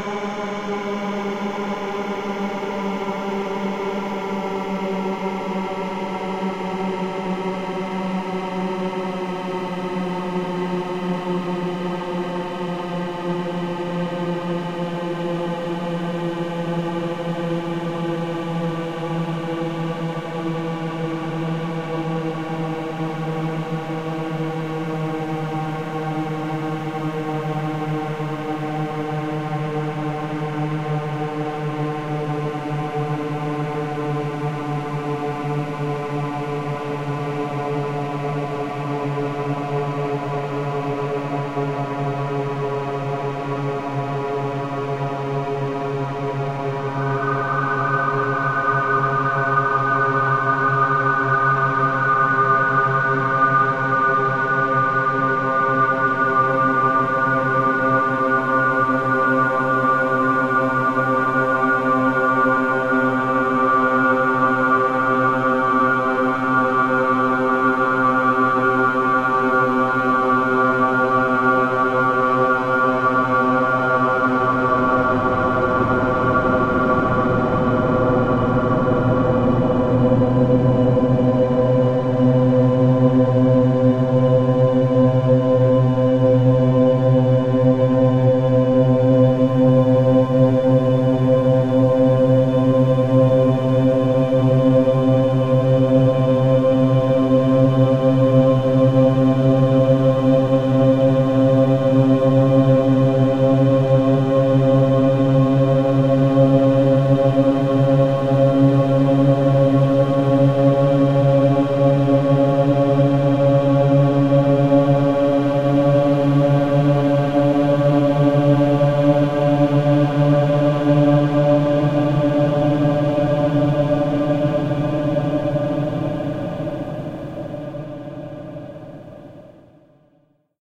Some parts sound like a locomotive engine.